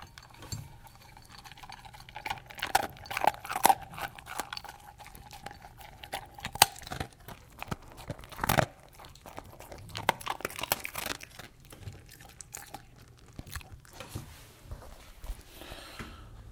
Medium size dog eating from dish
Dog eating from dish. Recorded on iPhone 6 with internal mics, about 6 inches away. Recorded with Rode app (pro version). iOS processing is turned OFF. The file is unedited.
Rode App
breakfast, dog, snack